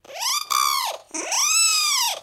The baby phase of a growing monster
Recorded using NGT-2 directly by laptop microphone in. Pitch shifted using Audacity.
voice, monster